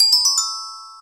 vMax Bells
Short noise/ sound for notifications in App Development.
app, chime, click, development, Ring, sounds